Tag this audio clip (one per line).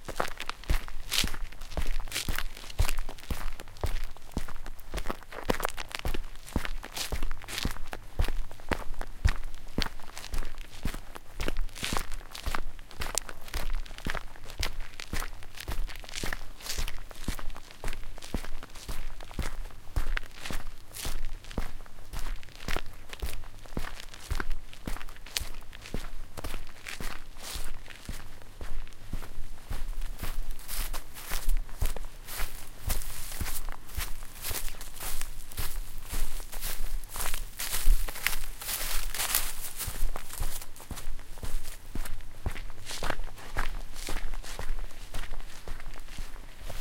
walking
ground
footsteps
steps
gravel